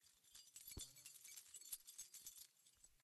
A chain of keys being shaken